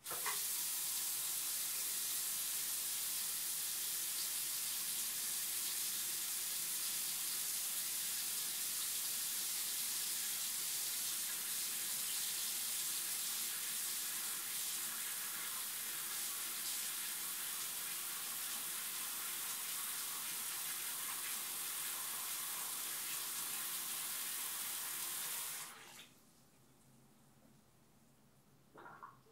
Faucet On/Off Far
Turning my faucet on, letting the water run, and turning it off recorded 10 feet away